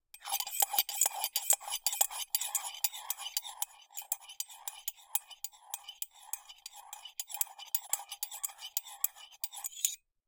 Stirring drink in a coffee mug with a spoon.
ceramic, coffee, cup, metallic, mug, scrape, spoon, stir, stirring